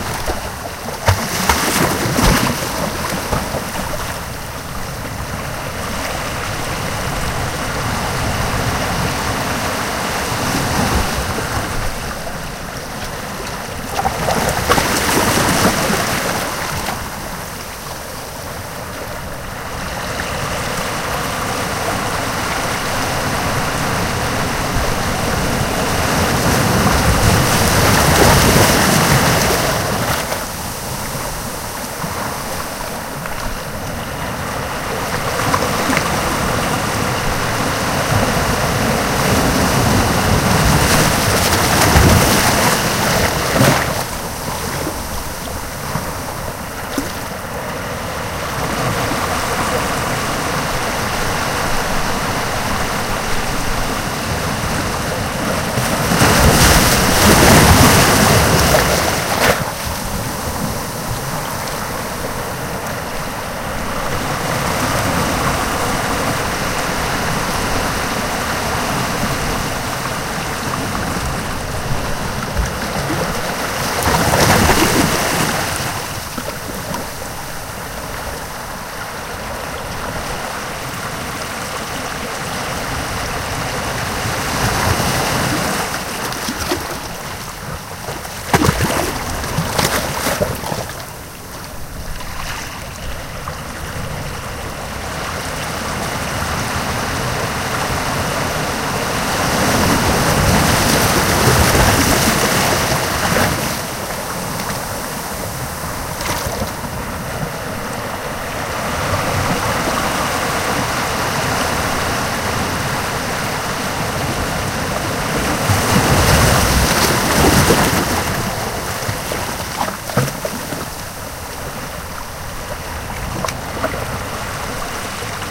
Ocean waves at Point Reyes. Edited as a loop.
beach, close, field-recording, loop, ocean, Point-Reyes, sea, seashore, slosh, splash, stereo, water, waves, wet